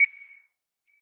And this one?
Short beep sound.
Nice for countdowns or clocks.
But it can be used in lots of cases.